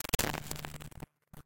Viral Noisse FX 07